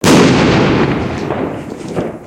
Made with fireworks